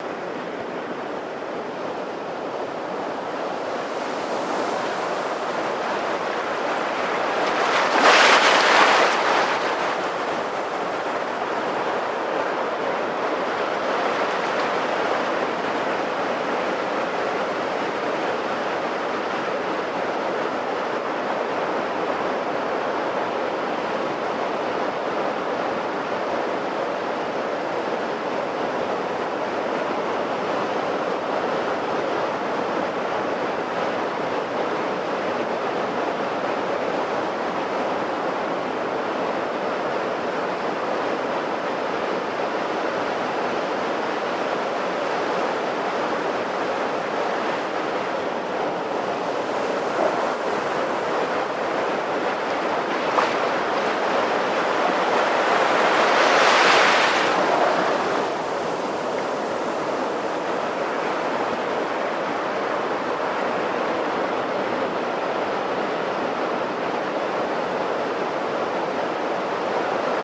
MAR OMAN
A close recording of small waves on a stoney beach in Oman. Nice crunch from the stones. (Mono 48-24; Rode NTG-2 Shotgun Mic/PMD 660 Marantz Portable Recorder.)
beach, dhofar, oman, waves